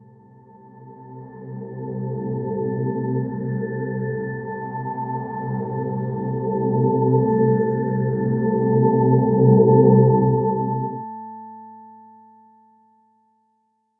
an ominous drone